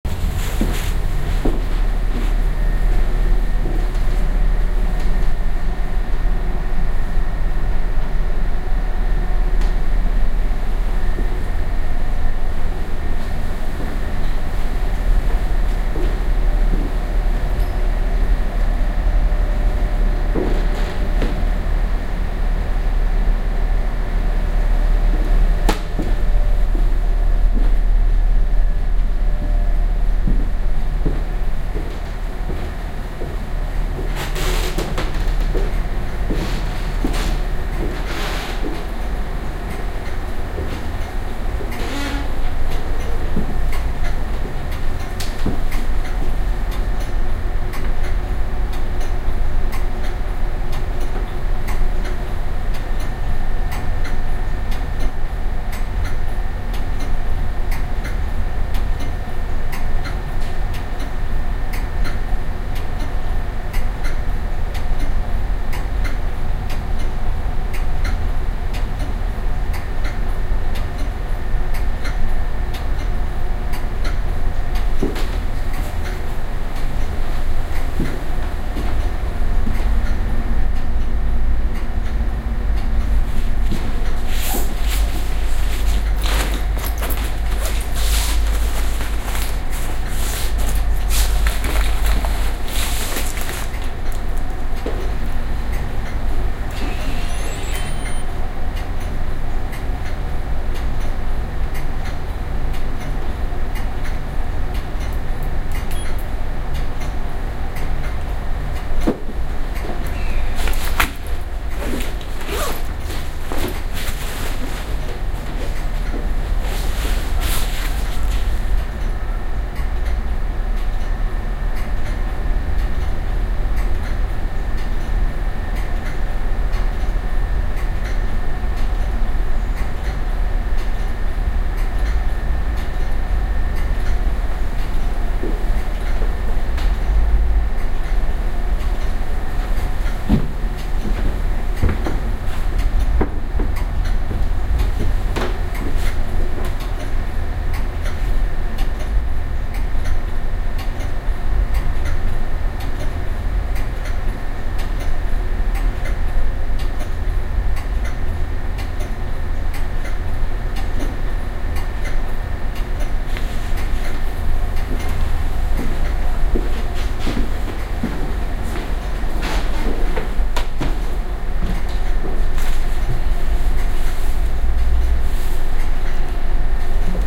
Mansion House - Guildhall Clock Museum
station, field-recording, london-underground, tube, metro, city, train